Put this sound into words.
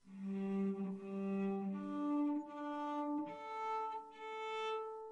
cello opennotes harmonics
A real cello playing open string harmonics. Recorded with Blue Yeti (stereo, no gain) and Audacity.
cello, classical, harmonics, instrument, open-strings, string, stringed-instrument, strings, tuning, violoncello